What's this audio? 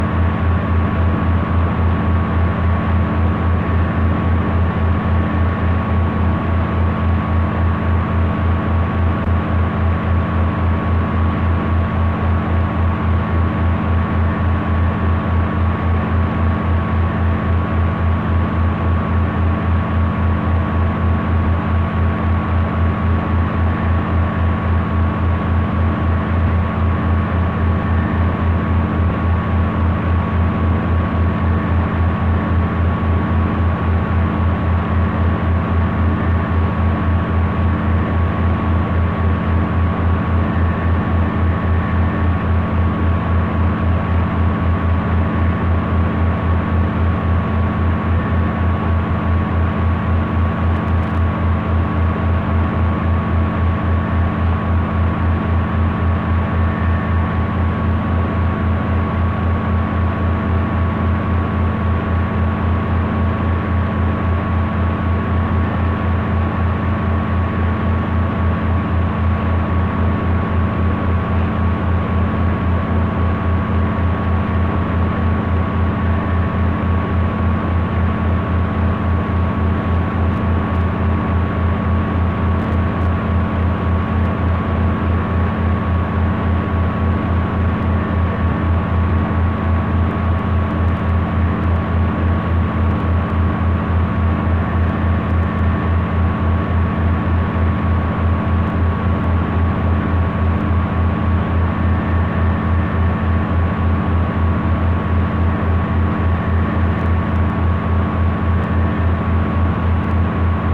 Unidentified signal (any radio operator in the room?)
unidentified, electronic, dxing, shortwave, radio, static, noise